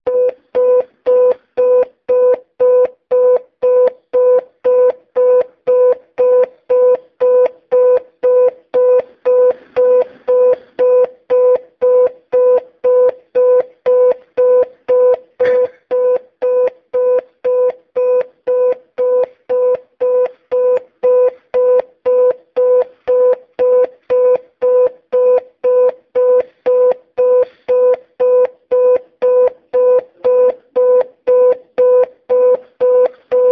Sound coming from the emergency speaker phone - system intercom - in a parking lot in central Brussels. Recorded in AMR format with my Nokia N73, most lo-fi of my recorders.
lo-fi, ring, speaker, tone, phone, noise, field-recording, city